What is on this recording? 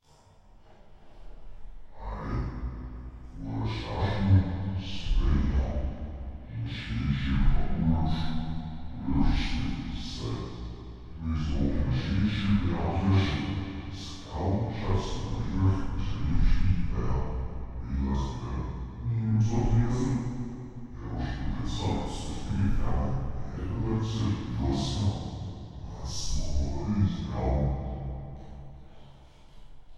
Drone sounds that are Intense and scary. These aliens love to chatter!.
ambiance, ambience, besthorror, creepy, demon, Drone, evil, fear, fearful, free, ghost, ghostly, greoan, growl, haunted, hd, horror, intence, moan, nightmare, paranormal, professional, pure, scary, sinister, spectre, spooky, zoltok